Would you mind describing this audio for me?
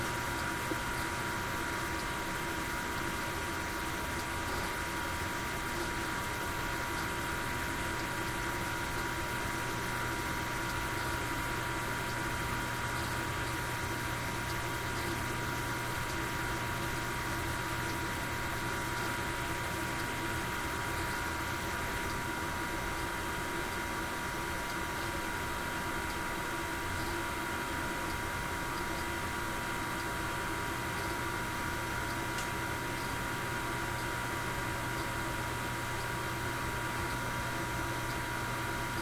Computer Humming
Just a recording of my Desktop running. It can make use for a piece of Ambiance for a project!
Ambiance, Foley, Sound, Studio